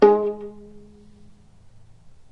violin pizz vib G#2
violin pizzicato vibrato
vibrato, pizzicato, violin